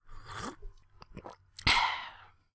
Drink Sip and Swallow
Clean recording of a sip from a cup of coffee, and a swallow. Mono from a Blue Snowball mic